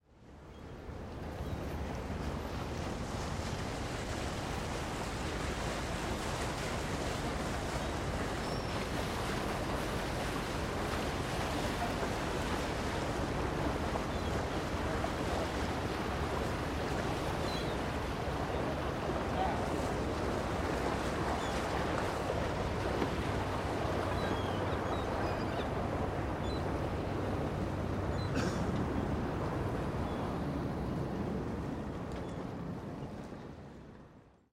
A Tugboat cutting through the waves.
Recorded with a Sennheiser 416 into a Sound Devices 702 Recorder. Used a bass rolloff to remove rumble. Processed and edited in ProTools 10.
Recorded at Burton Chace Park in Marina Del Rey, CA.